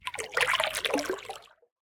Water Paddle medsoft 009
Part of a collection of sounds of paddle strokes in the water, a series ranging from soft to heavy.
Recorded with a Zoom h4 in Okanagan, BC.
field-recording, river, zoomh4, lake, water, splash